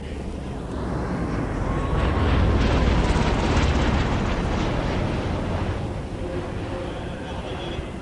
Osprey military hover aircraft flyby at a US Army Special Forces demonstration in downtown Tampa Florida in May 2012.
air, aircraft, field-recording, flyby, hover, military, Osprey